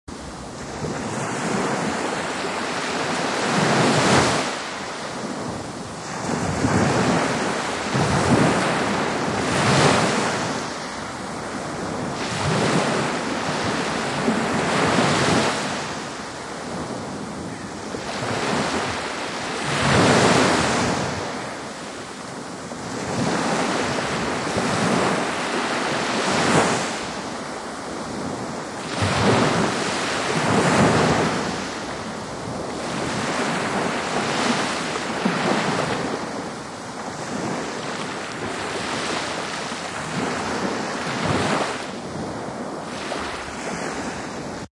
Ocean Waves 01
Ocean Waves by the Baltic Sea (Stubbenkammer).
Recorded with a Tascam DR-05.
Thank you for using my sound!
baltic, beach, coast, field-recordng, meer, nordsee, ocean, oceanside, ostsee, ozean, sea, seaside, shore, splash, water, waves, wellen, wind